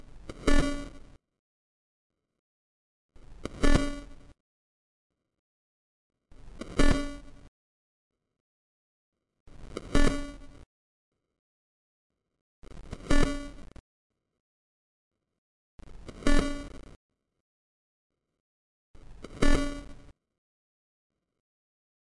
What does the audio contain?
Electronic Beeping Machine (EKG)
computer, machine, lo-fi, noise, beeping, monitor, digital, beep, glitch, electronic, pulse, robot